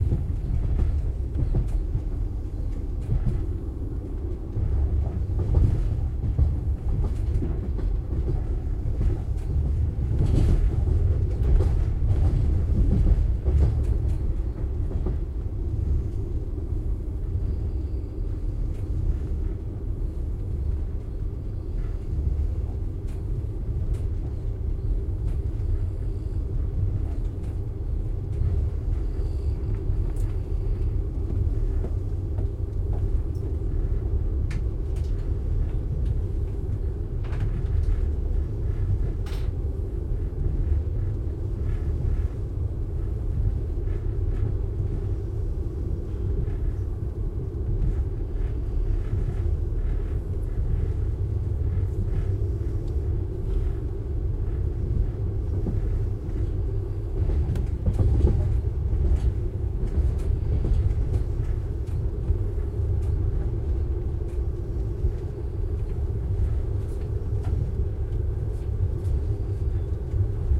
Ride in the passenger wagon at night. The train passes a waypoint arrow. Snoring passengers.
Recorded 30-03-2013.
XY-stereo.
Tascam DR-40, deadcat